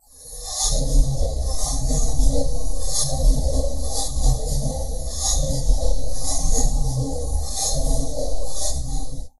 Grave Groove Sound loop
A part from a drumloop played by a drummer and brewed in multi effect processor.
percus, groove, loop